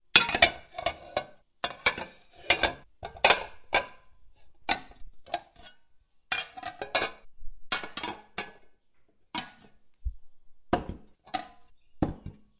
Cutlery - Plates
Plates banging together. (New Zealand)
Cooking, Kitchen, Plates, Household, Cutlery